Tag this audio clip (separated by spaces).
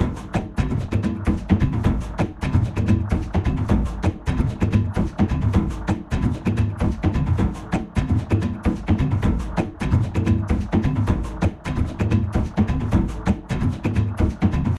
techno sample loop percussion music 130 bpm